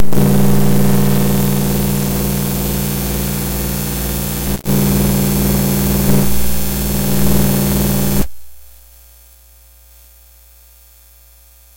Some interesting noise clipped while recording.